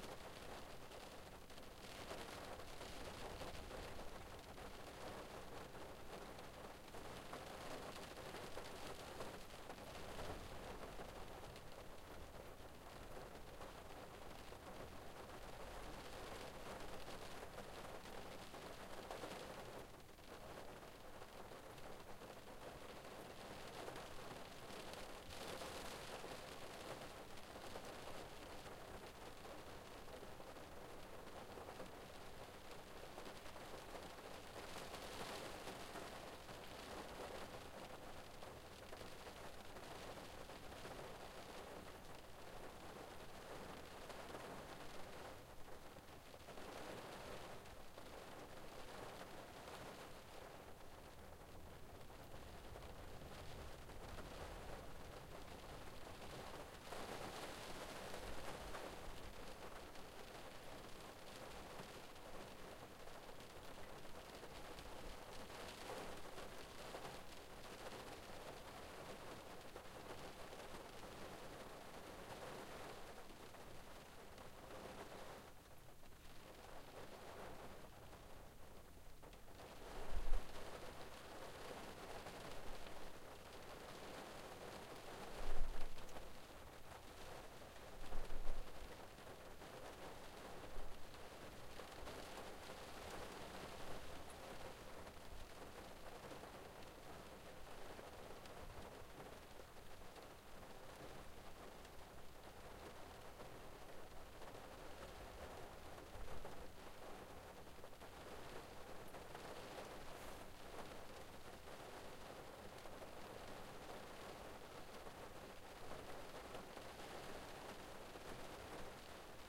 Rain on Car Windshield
This is the sound of rain pounding against the car windshield. Loopable.
car, field-recording, rain